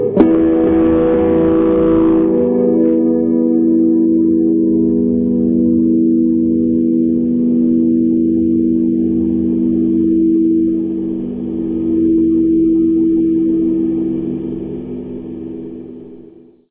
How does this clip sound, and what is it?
Guitar Feedback 3
I recorded myself making ringing feedback noise with my guitar through a valve amp, plus some wah.
Guitar, ringing, high-pitch, Feedback